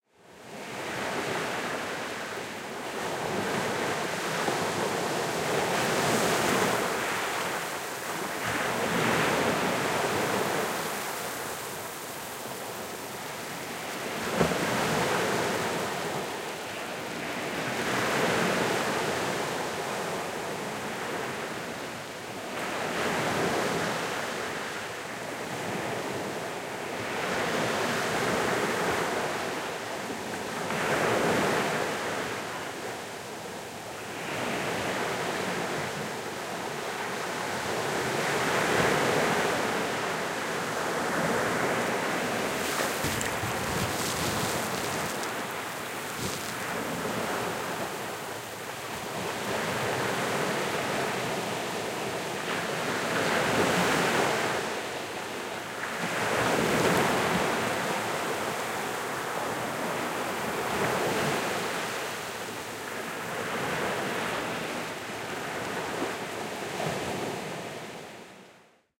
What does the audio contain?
Pattaya beach recorded near the waves and foams with Rode iXY.